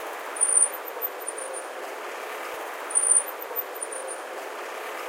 high-pitched sound from a truck's brakes, heavily processed to resemble the call of a bat, bird or something/el ruido de los frenos de un camin procesado para parecerse al canto de un murcilago, un pjaro o algo